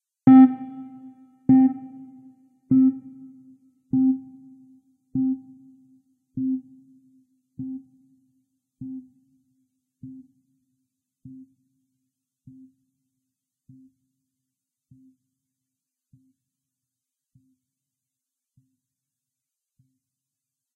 ZynAddSubFx + Rakarrack overdrive echo